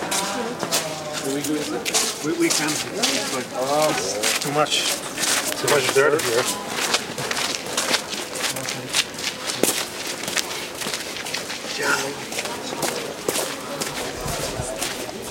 chuze cizincu v prazske ulici
walk people from abroad after the Prague street